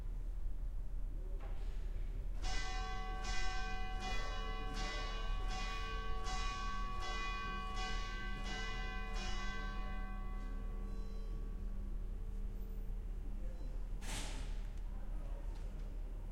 Church bell of genova recorded from one of the narrow streets